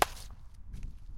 Bat Hit 1 FF103
Baseball Bat slam, hit close Ext.